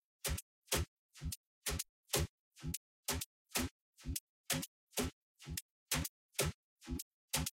filtered hatsnare
Part of 7 sounds from Corona sound pack 01\2022. All sounds created using Novation Bass Station II, Roland System 1 and TC Electronics pedal chain.
Unfinished project that I don't have time for now, maybe someone else can love them, put them together with some sweet drums and cool fills, and most of all have a good time making music. <3
beats, drums, filtered, percussion-loop, percussive